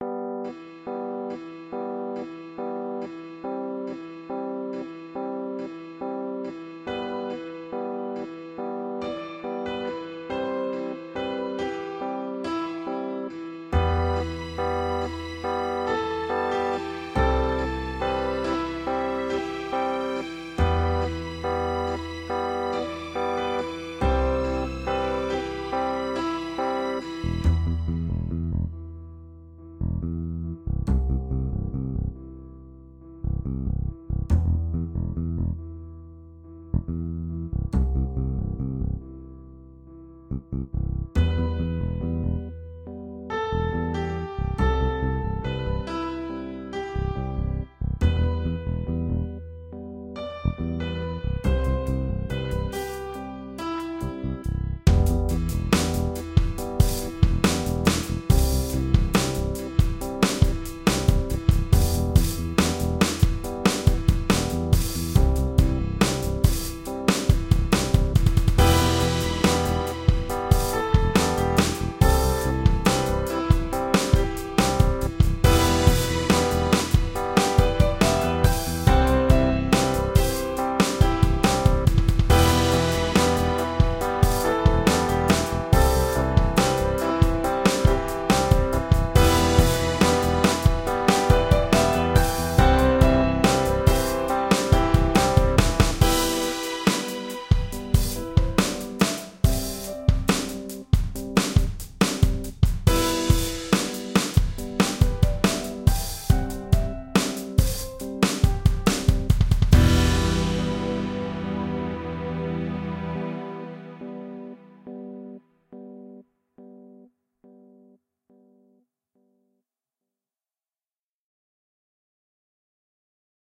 Bunny Hop
Dark but driving instrumental. Good for an outro, or documentary.